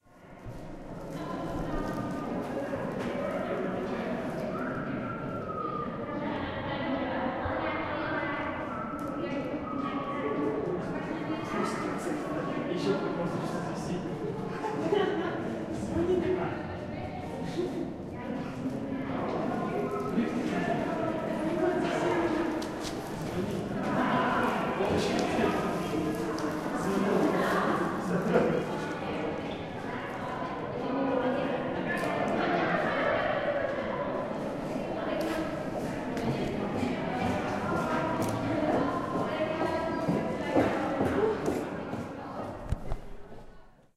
Greenwich Foot Tunnel 1
A recording made in Greenwich Foot Tunnel
ambience, field-recording, London, tunnel, underground, zombies